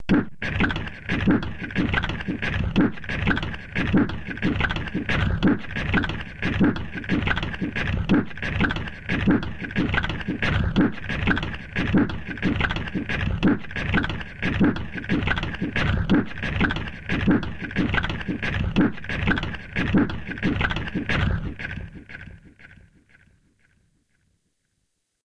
kitchen beat 1 90bpm
Quite minimal and processed to hell.
delay, distortion, filter, funky, kitchen, loop, processed